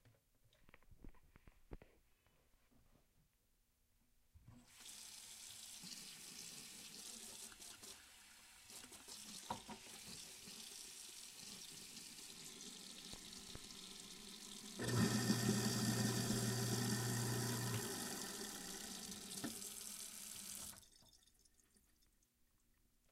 Kitchen Sink & Garbage Disposal
A kitchen sink running then a garbage disposal being turned on then off then the sing is turned off.
garbage, sink, disposal